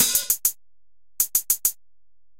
100 Dertill n Amp Drums 02-hats
crushed, digital, dirty, drums, synth